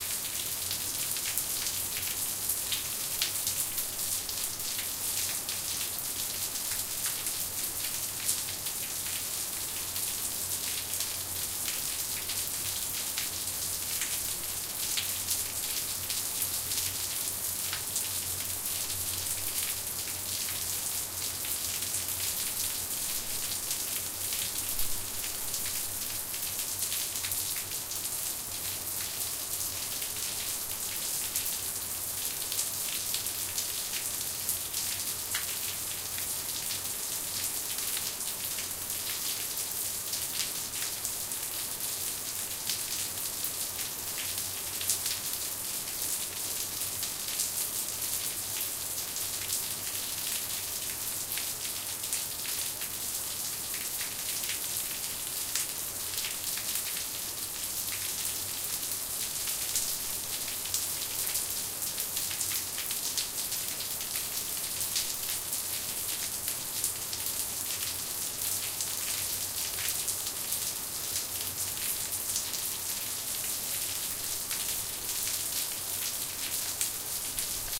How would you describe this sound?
Water splashing in off the roof during heavy rain.